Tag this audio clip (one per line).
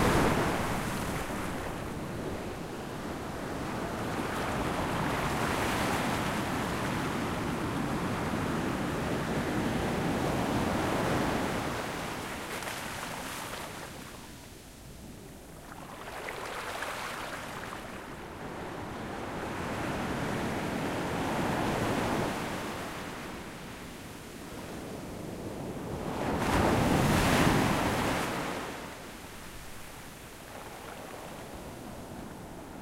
h4n
beach
ambience
zoom
field-recording
portugal
waves